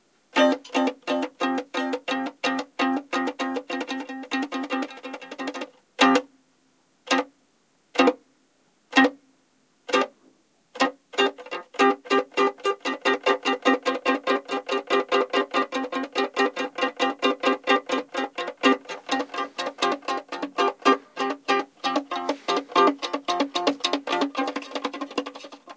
random noises made with a violin, Sennheiser MKH60 + MKH30, Shure FP24 preamp, Sony M-10 recorder. Decoded to mid-side stereo with free Voxengo VST plugin.